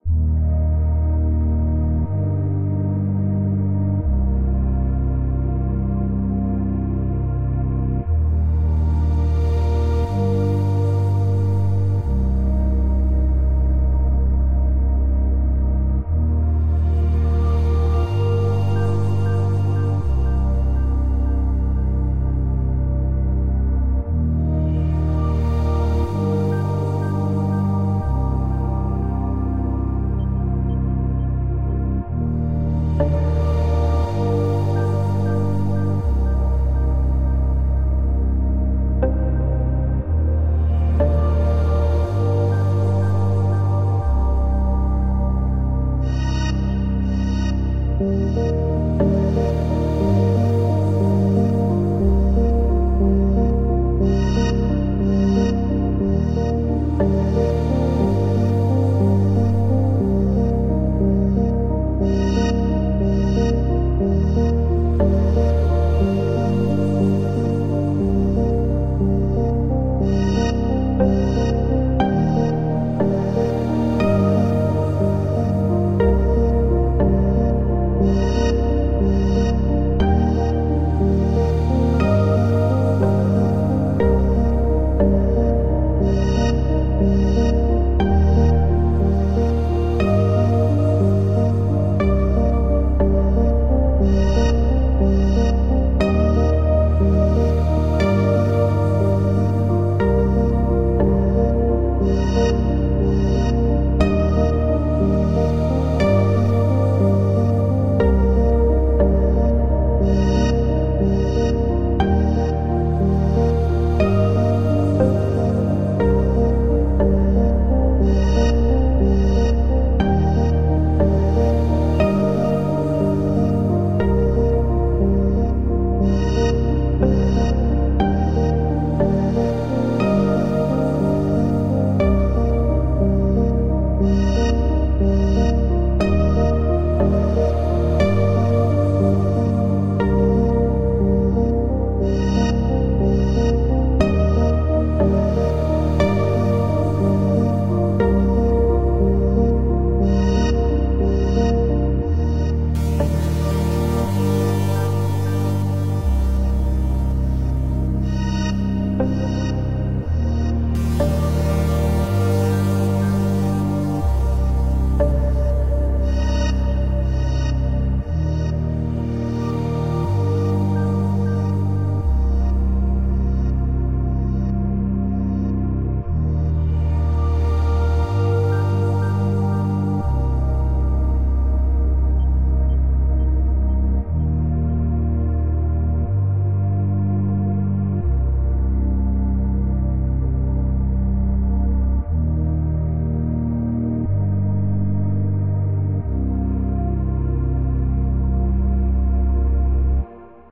Ambiance guitar X1 - Ambiance music track,
Ace, Ambiance, ambient, atmosphere, effect, electronic, guitar, Loop, loopmusic, music, original, Piano, sample, sound, soundtrack, stab, stabs, track